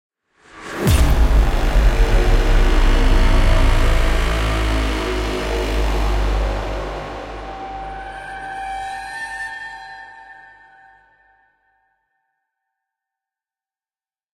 Horror Hit 4
Produced in FL Studio using various VSTs
suspense, drama, scary, soundtrack, ambient, thrill, sinister, soundscape